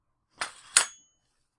Sheathing a sword, recorded with a real sword; tried to over-exaggerate the sound so it would be heard better.